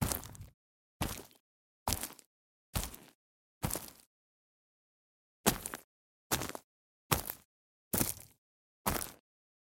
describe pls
Footsteps Boots Gritty Ground Woods Barks Mono
Footsteps sequence on Gritty Ground (woods and barks) - Boots - Walk (x5) // Run (x5).
Gear : Tascam DR-05
bark, barks, boots, dirty, field, foot, footstep, footsteps, gritty, ground, recording, run, running, step, steps, tascam, walk, walking, wood, woods